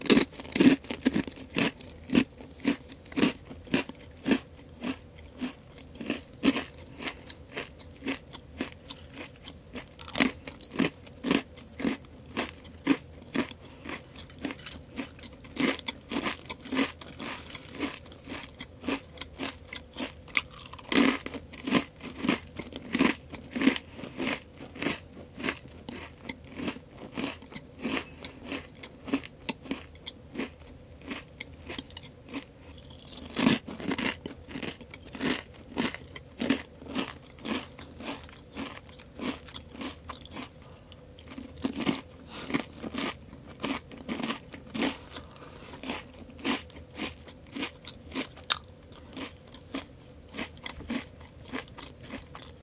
Eating Chips
Eating some munchies. Recorded with a ZOOM H2N and MY MOUTH!
Food, Crunch, Chips, Eating